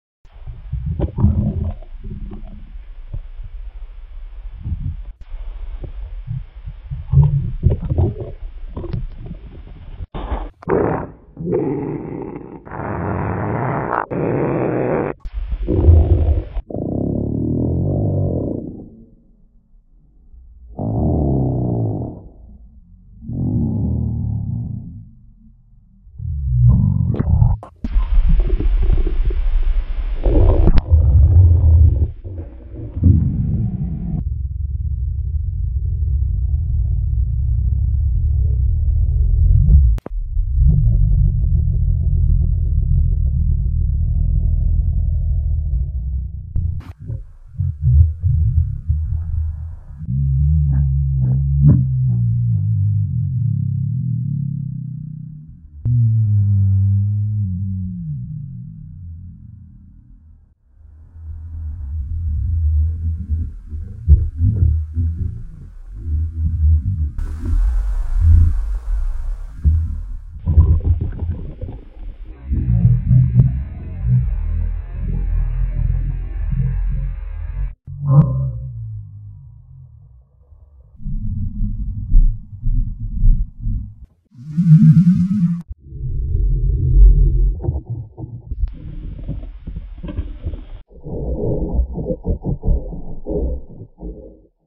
My Tummy's Intensive Moans & Hunger Rumbles
My stomach waited for 126 hours now. Now it is acting like a wild monstrous beast in a cave. Oooooooooooooooooooooooough! I don't feel like myself anymore! So hungry...! Ugggggh! Ooooooooooouuuuuugh! Ohhhhh!
starvation, grumbles, growling, sound, growls, roars, roaring, starving, borborygmi, moaning, rumbles, humans, rumbling, soundeffect, moans, females, grumble, sounds, recording, stomach, hungry, growl